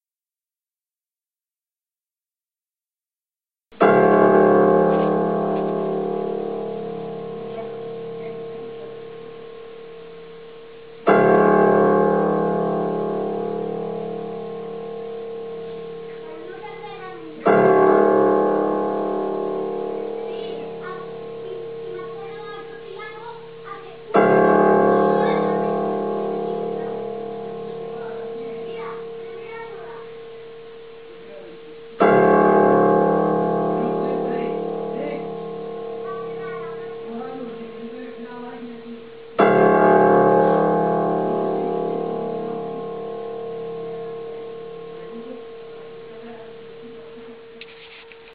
piano vell montseny6 P8170247 29-10-2010
more low notes than old piano 5. Faint voices outside...
montseny6, old, piano